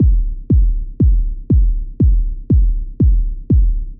Kick house loop 120bpm-04
120bpm, kick, loop